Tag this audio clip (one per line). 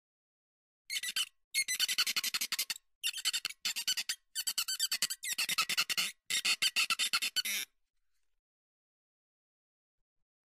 flamingo
squeaky
squeaking
friction
squeak